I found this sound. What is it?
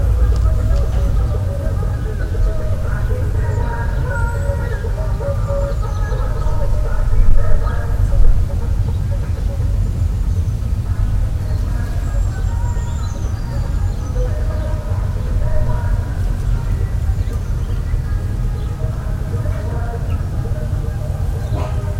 In a country in the south of France, a festive noise was picked up by my microphone off!